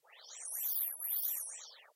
Using Audition 3 to sculpt images into white noise
Game-Audio, Sound-Design, Spectral